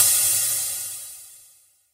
The Korg ER-1 is a virtual analog drum synthesizer + 16 step drum sequencer.
er-1, drum, cymbal, singlehit, va, korg